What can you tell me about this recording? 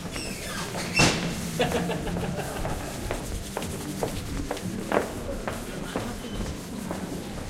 a soft bang, a guy laughs as if he didn't care, and footsteps passing by. Shure WL183, Fel preamp, Edirol R09
footsteps, laughing, street-noise, field-recording